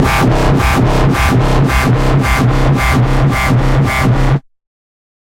110 BPM, C Notes, Middle C, with a 1/4 wobble, half as Sine, half as Sawtooth descending, with random sounds and filters. Compressed a bit to give ti the full sound. Useful for games or music.
digital, LFO, 1-shot, processed, wobble, porn-core, dubstep, notes, electronic, techno, synth, wah, synthesizer, Industrial, bass, synthetic